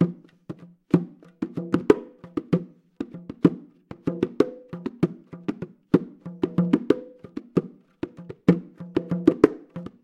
A small section of bongo playing, recorded in studio.